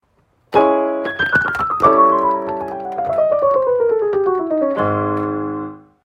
Chromatic Scale on Keyboard